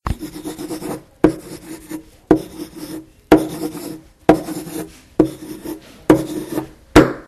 mySound LBFR estella
Sounds from objects that are beloved to the participant pupils at La Binquenais the secondary school, Rennes. The source of the sounds has to be guessed.
cityrings, Estella, France, LaBinquenais, mysounds, pencil, Rennes